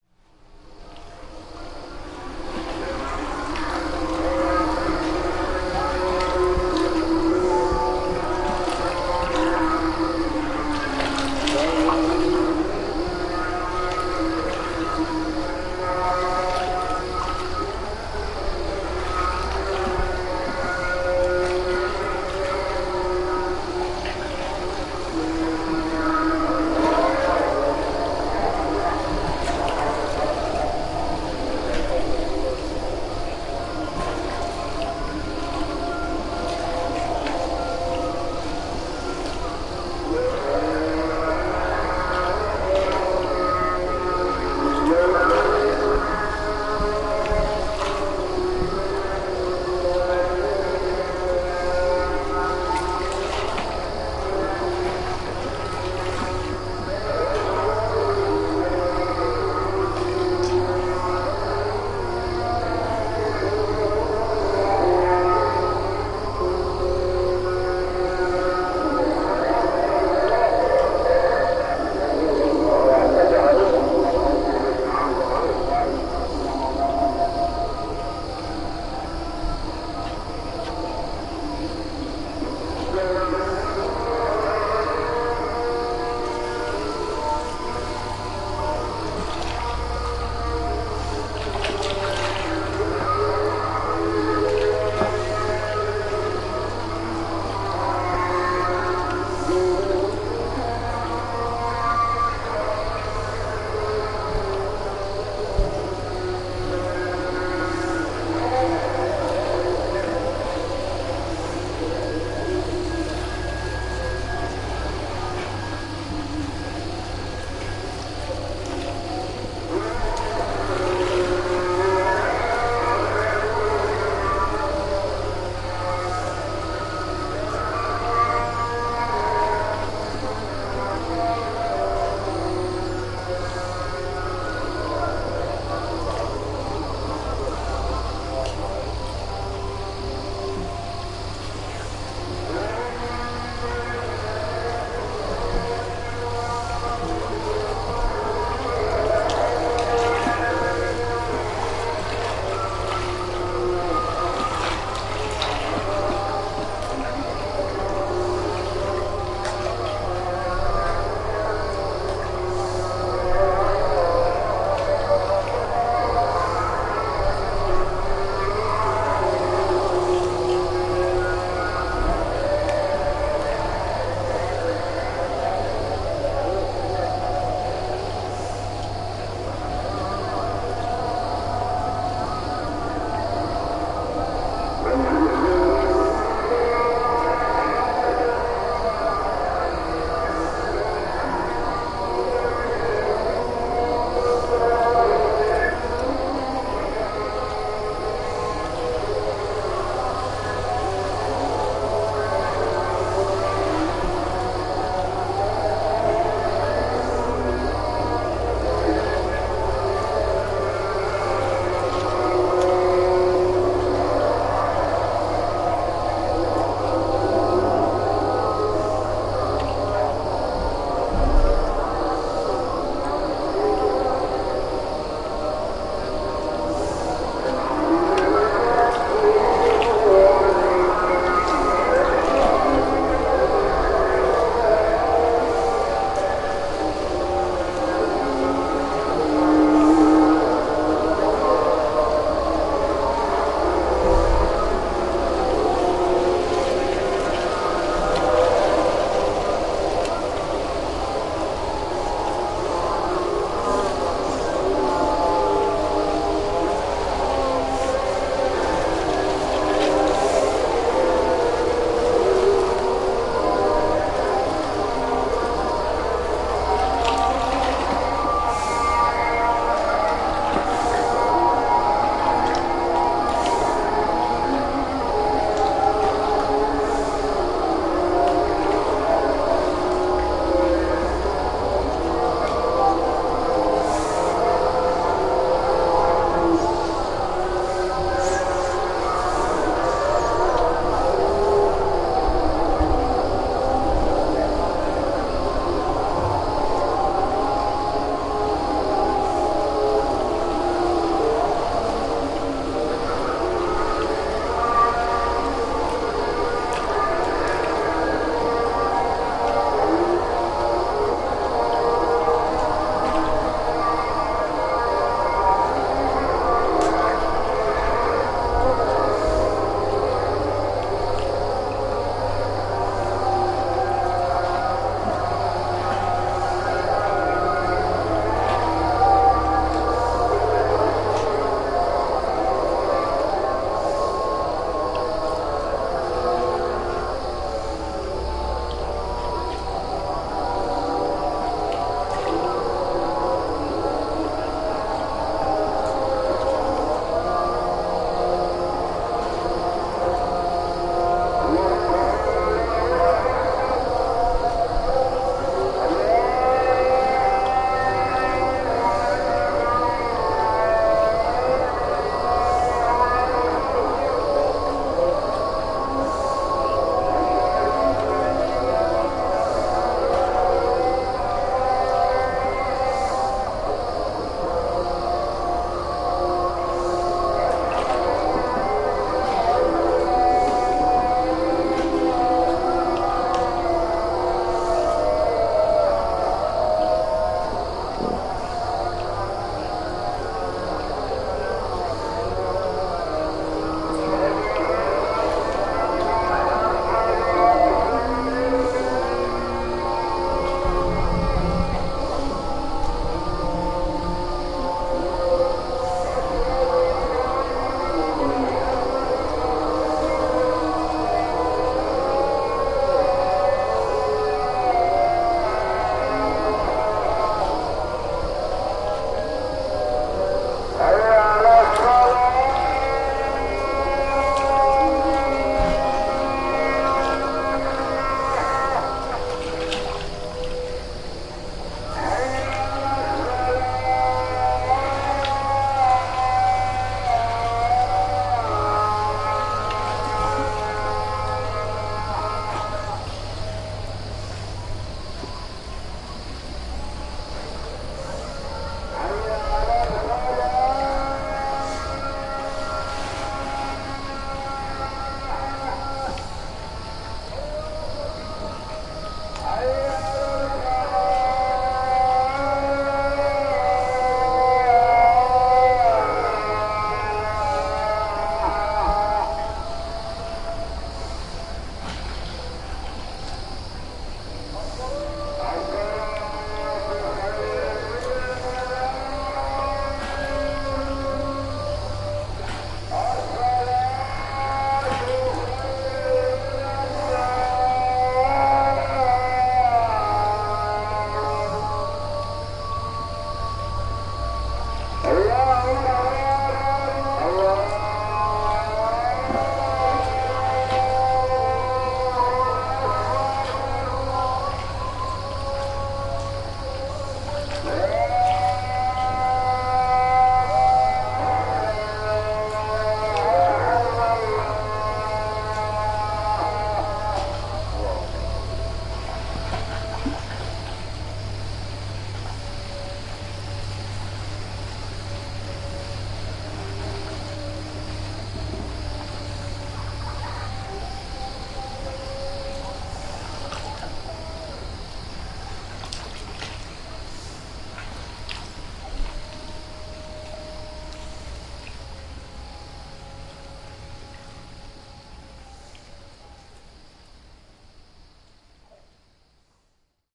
Night at Anchor - The Nile
Late night recording made at anchor along the Nile, Egypt (long version)
adhan, ambiance, ambience, ambient, anchor, boat, call-to-prayer, calm, dock, field-recording, insects, Islamic, marsh, meditate, meditation, nature, night, Nile, peaceful, pray, relaxing, religion, river, ship, spiritual, water, waves